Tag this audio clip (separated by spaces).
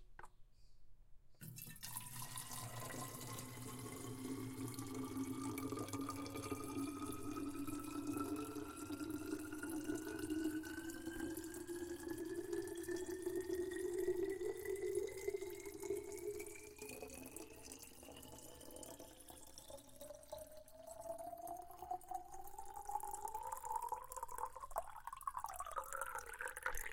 recording Water-water